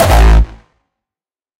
Hardstyle Kick A2
a Kick I made like a year ago. It has been used in various tracks by various people.
909, access, c, dong, drumazon, hardstyle, harhamedia, kick, raw, rawstyle, roland, sylenth1, tr-909, virus